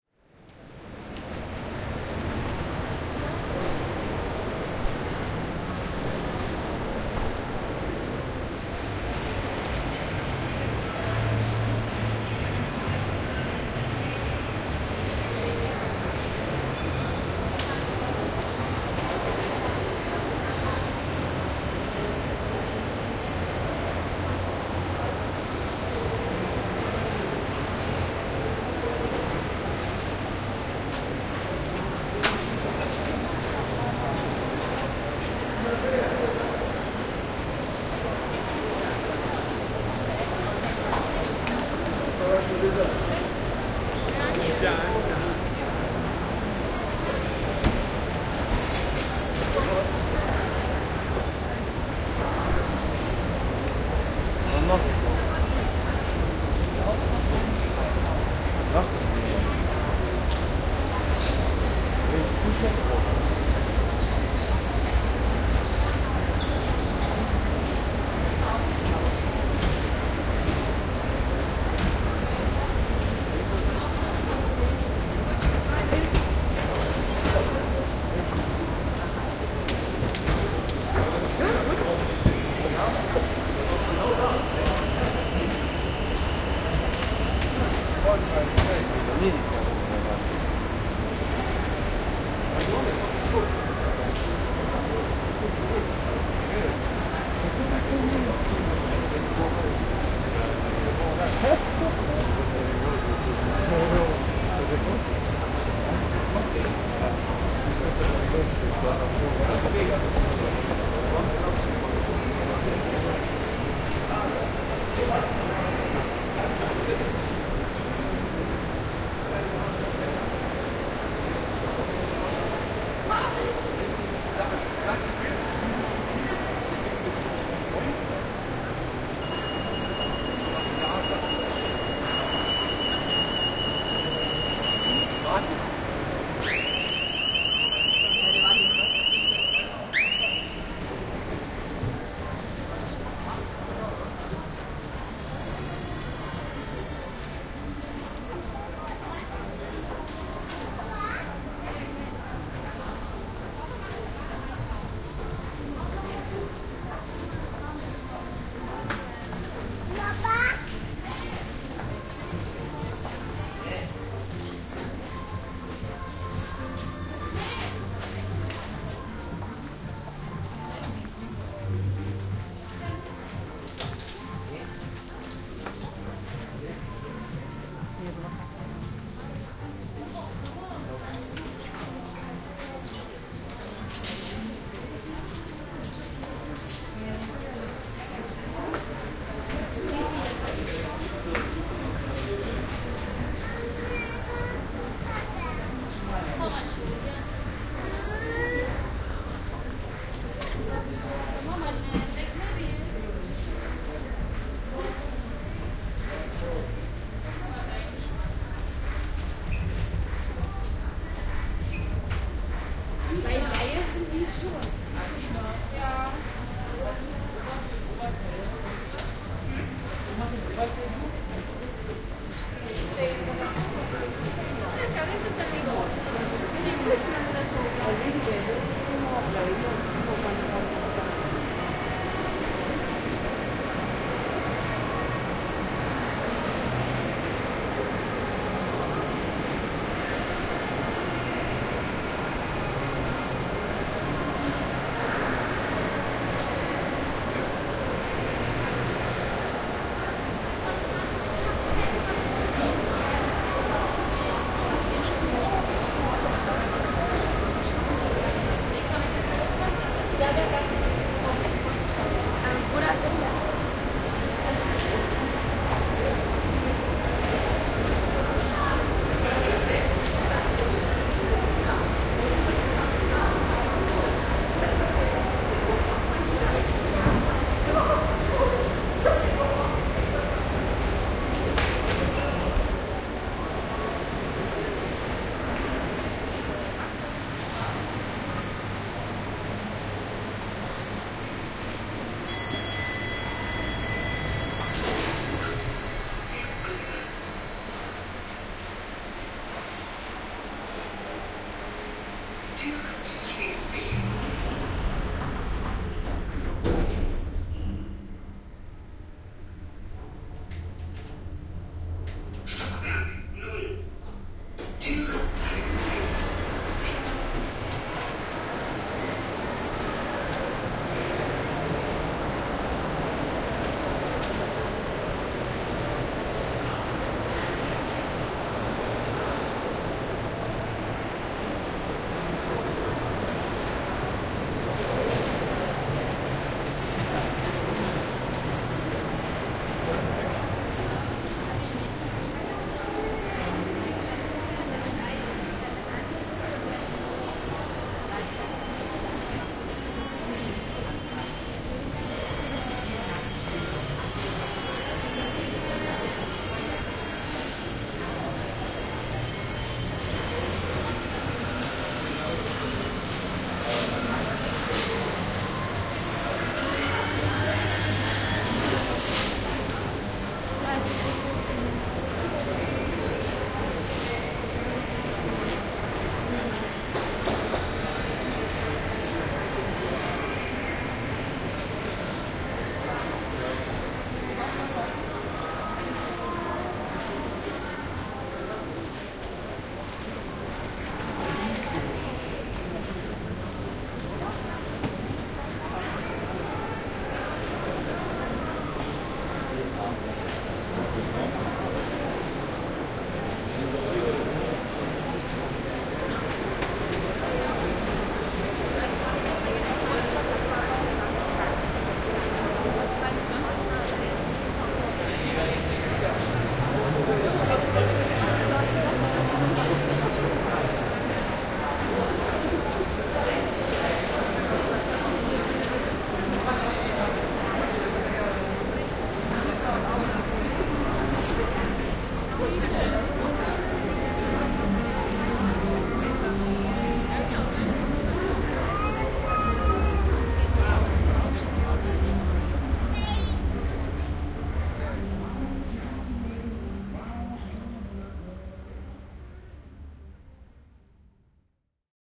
shopping mall sounds
A long walk around one of Berlin`s biggest shopping malls. Lots of people, lots of shopping. Recorded with OKM mics.